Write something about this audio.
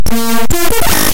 Computer Noise
The sound a corrupt file might make if we lived in the world of science fiction where all programs make sounds. Perhaps a robot goes out of control due to sciencey reasons and makes this sound. Who knows?
computer, science-fiction, digital, weird, artificial, robot, sci-fi